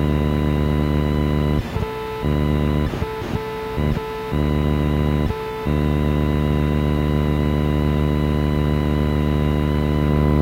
on hold2
analog, comms, communication, digital, distorted, distortion, electronic, field-recording, garbled, government, military, morse, noise, radar, radio, receiver, signal, soundscape, static, telecommunication, telegraph, transmission, transmitter